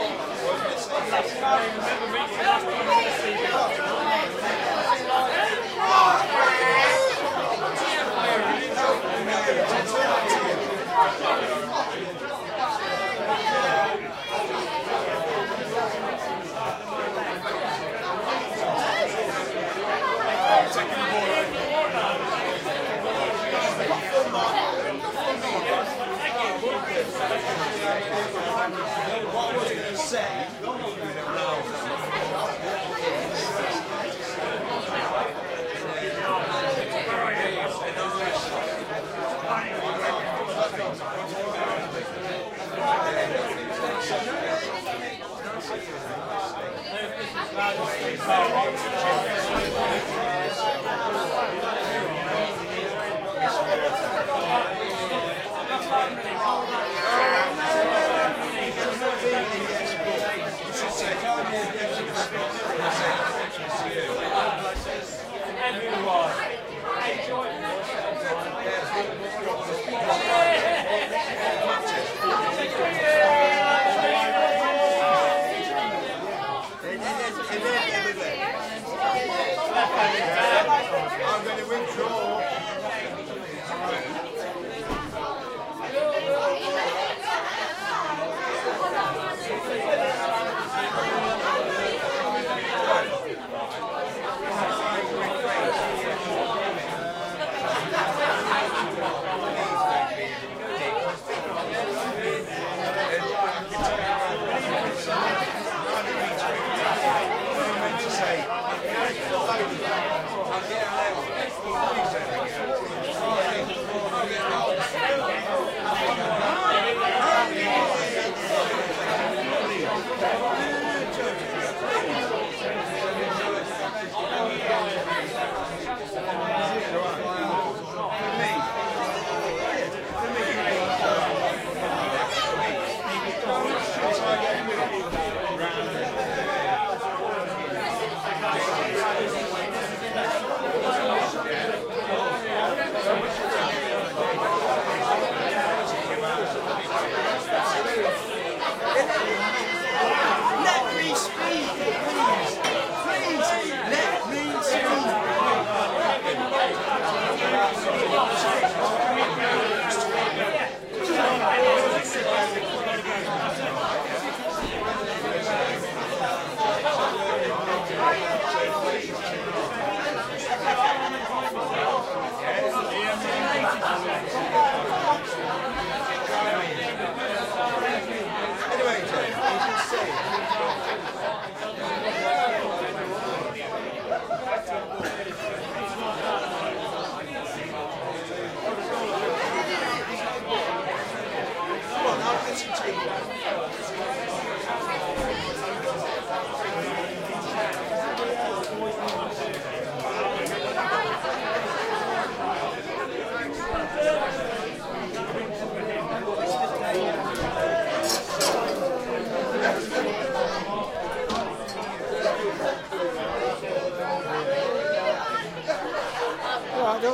Recorded using a Zoom H2 in The Prince of Wales public house in Spondon, UK on Christmas Eve 2010. Recording is loopable if required. Total length is 3.5 minutes.
pub, english, british, ambience, field-recording, loopable